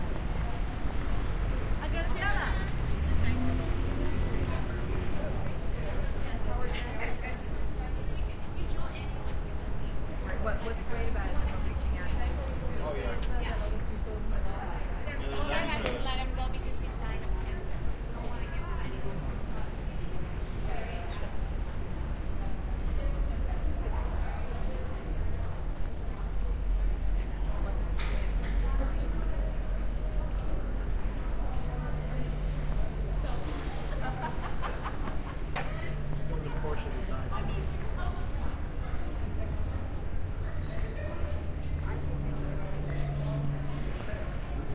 LA Hollywood 3 03.12.2006 snip1
Recorded in Los Angeles on Hollywood Boulevard Dez. 2006